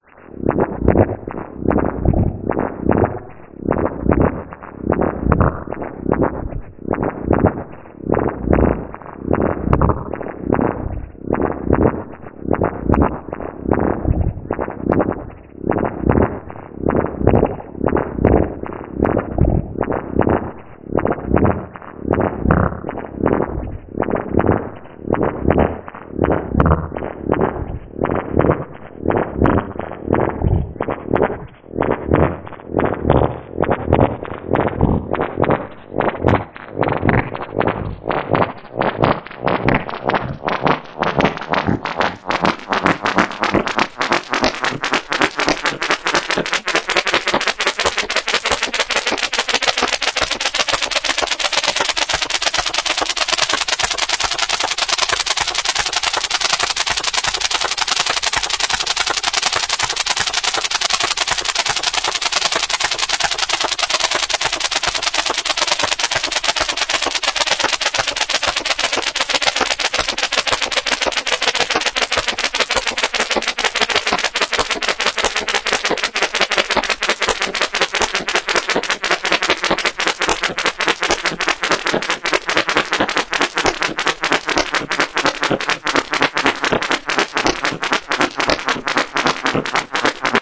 weird beat4
A little weird beat